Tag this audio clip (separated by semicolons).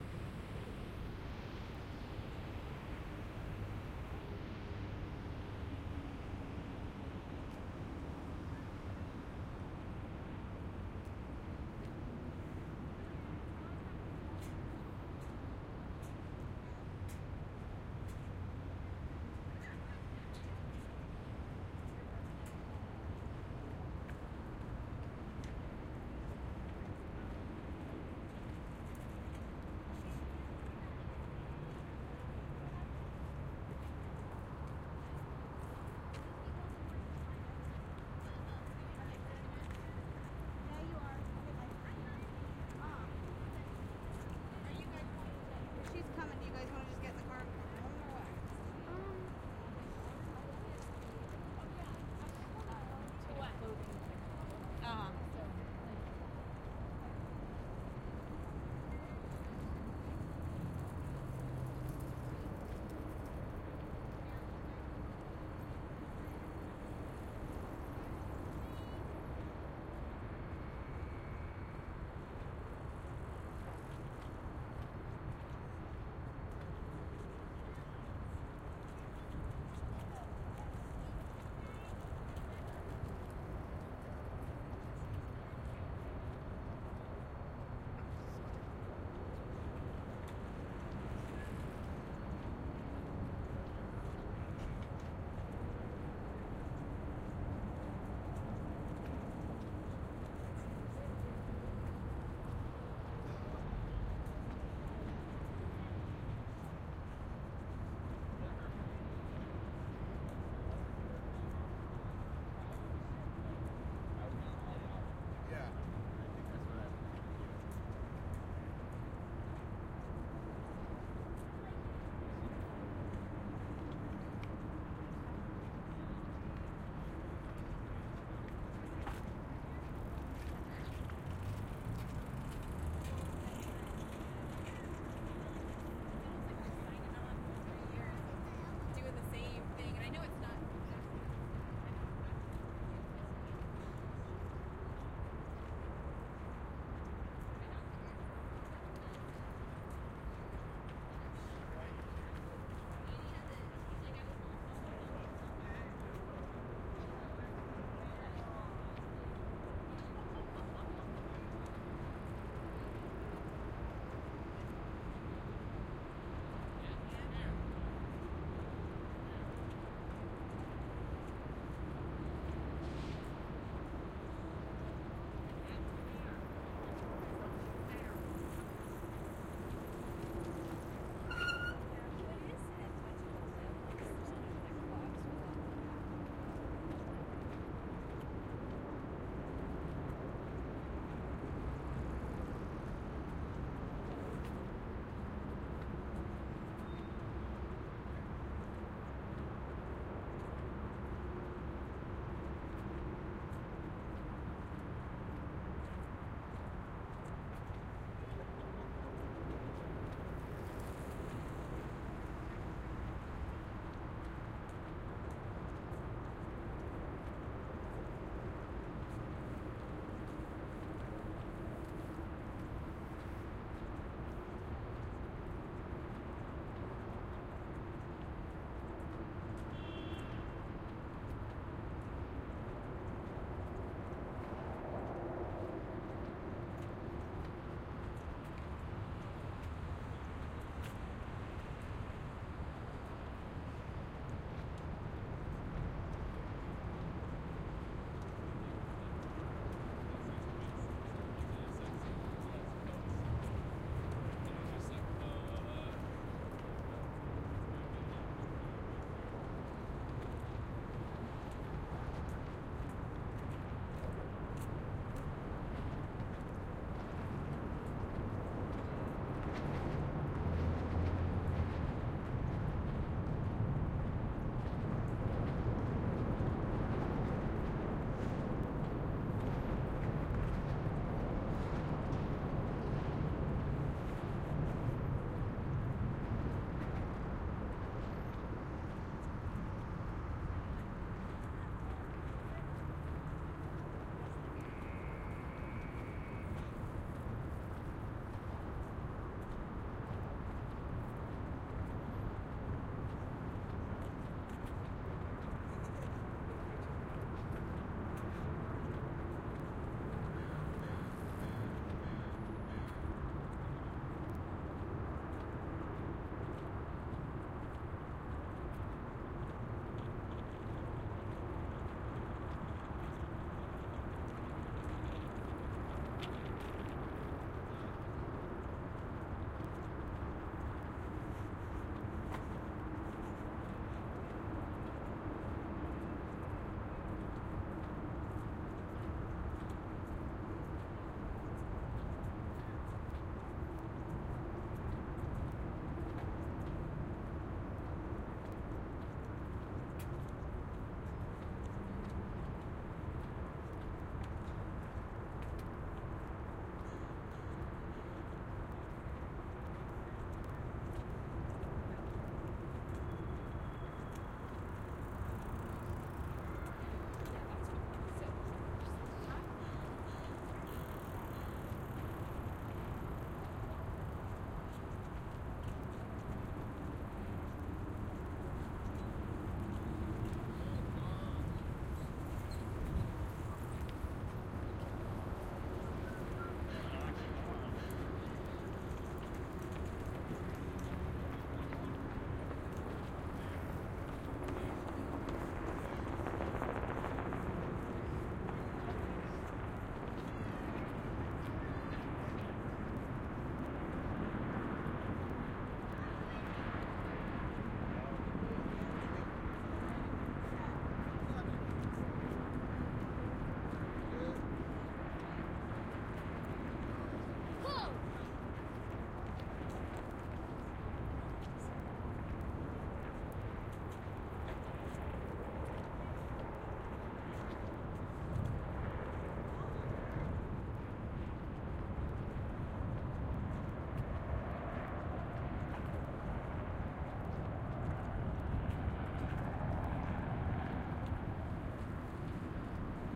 binaural; city; field-recording; sonography